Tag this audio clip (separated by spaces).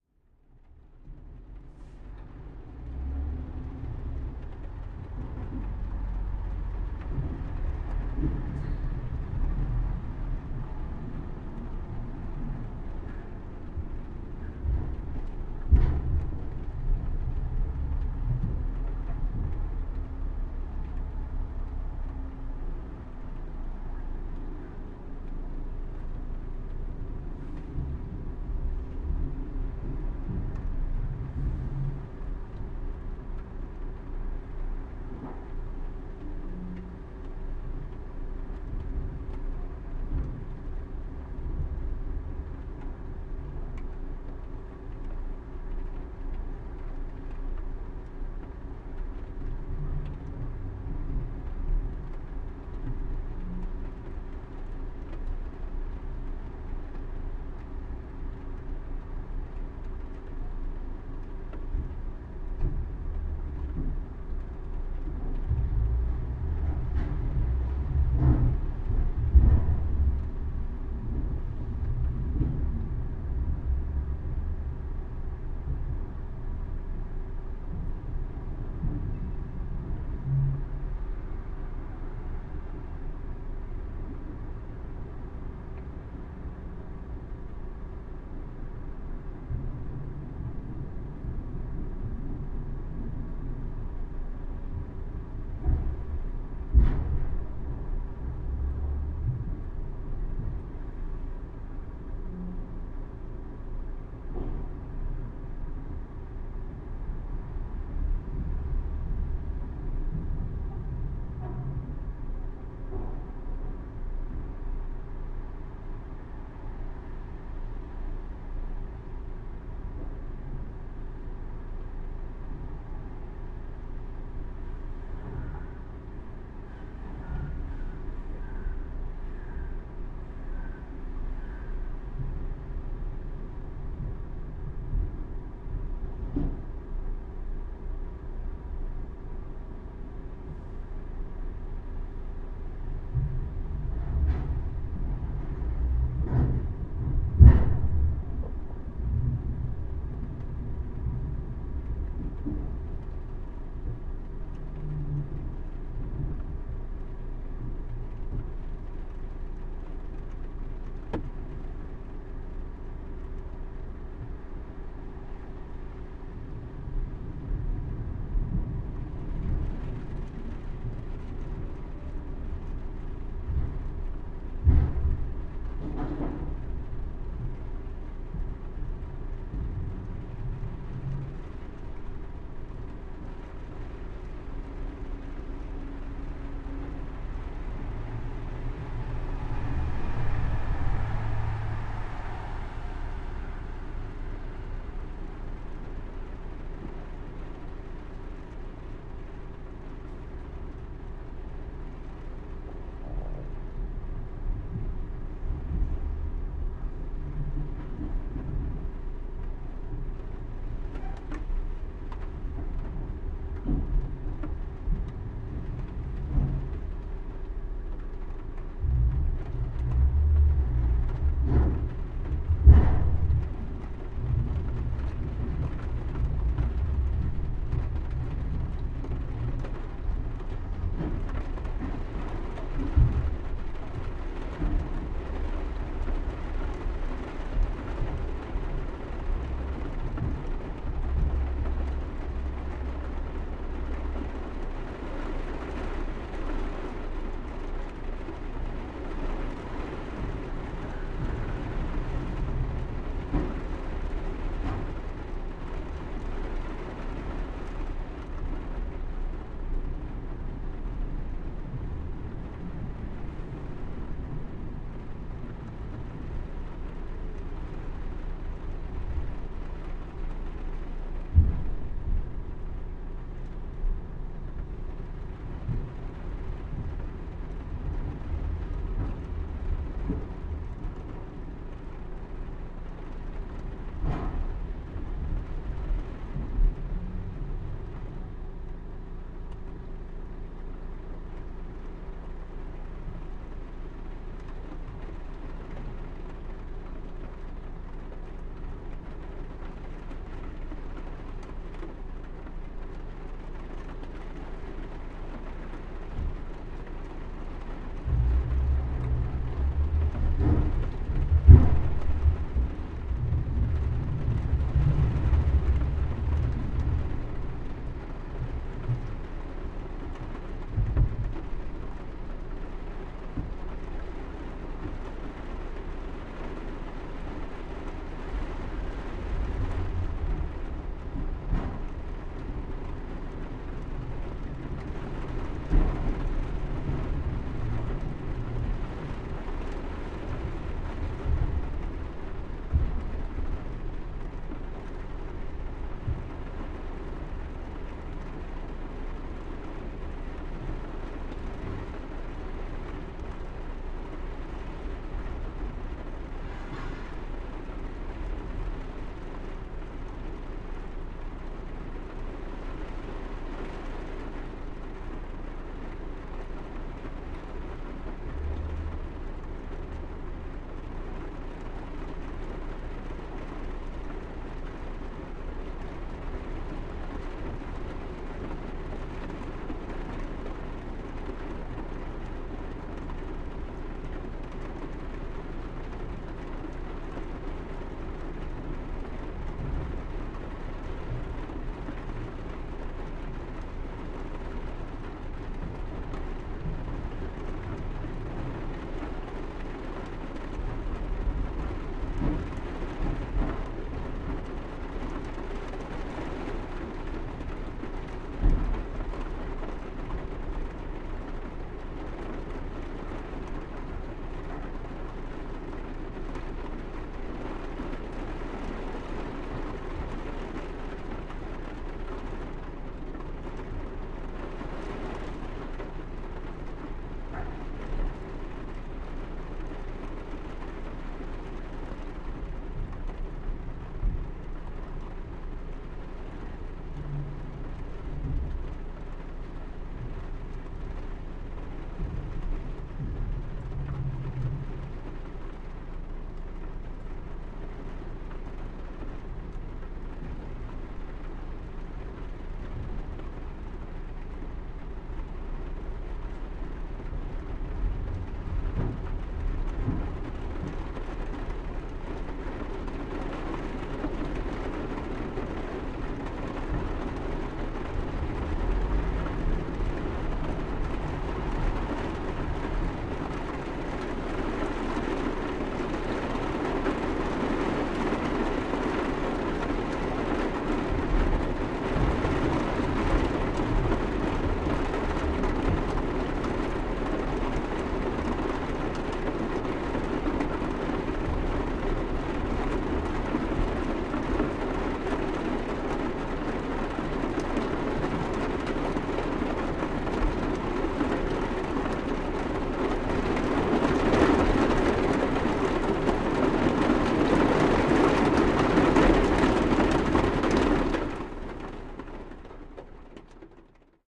cars drop Pozna drip dripping drops traffic Poland fieldrecording rain